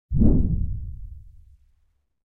Woosh Low 01
White noise soundeffect from my Wooshes Pack. Useful for motion graphic animations.
effect, fly, future, fx, noise, scifi, sfx, space, swish, swosh, transition, wave, whoosh, wind, wish, woosh